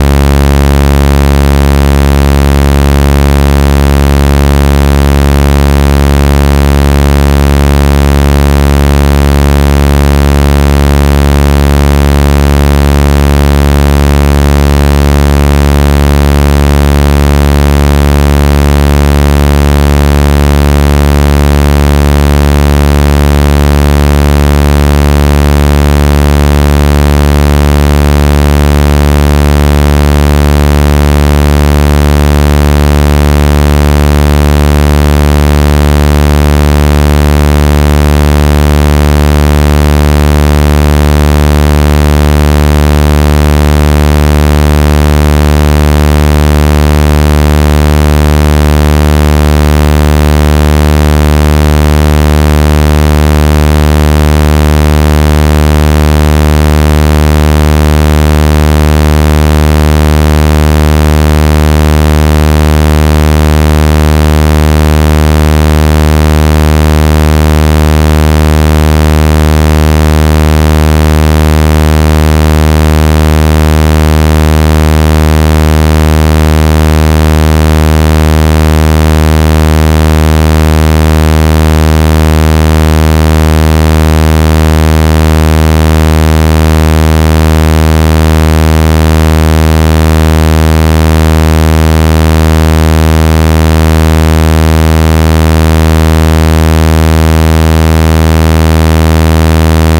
I made this in Audacity! 0.1 Hz increments every second!
Sound ID is: 593661